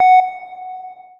Countdown Start
Quick tone with some reverb. Can be used as a start or stop indicator.
beep, clock, signal, start, stopwatch, timer, tone